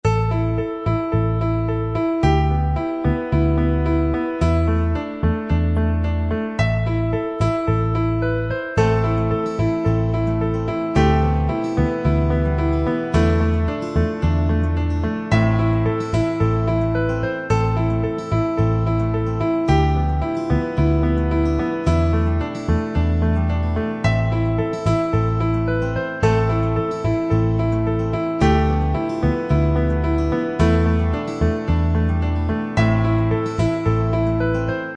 These are the musings of an artist at night. Going through a lot right now & this is the result. Made in Garage Band.
Just want to flood the world with love and music.
Check out my humble beginnings here (mastered album drop slated for Spring 2020):